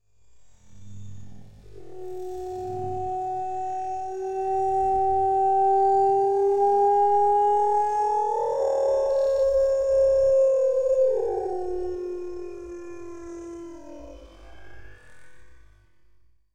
Processed Expressive Moan 1
A time-stretched version of the Expressive Moan in my Sled Dogs in Colorado sound pack. Recorded on a Zoom H2 and processed in Peak Pro 7.